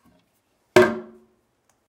Closing toilet lid